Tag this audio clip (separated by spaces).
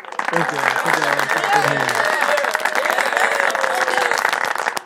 applaud applauding applause cheer cheering cheers clap clapping claps crowd deep elvis much thank very voice